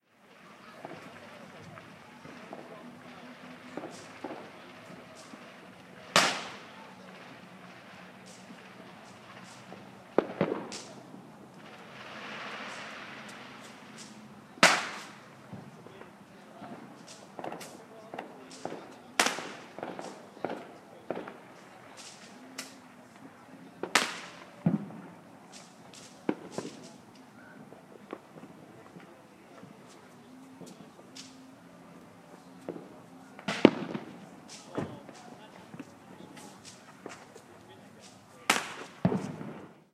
Sorry, no cheering crowds. Just crowd chatter and fireworks outside recorded with my iPhone. Time recorded: ~9:00 PM. Just wanted to get some firework sounds. Happy new year from Hawaii.